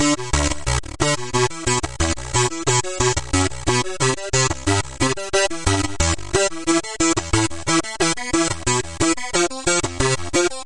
The same sequence as rancidlead but in this case, using the weirdlead(2). Chorus effect and delay effect too.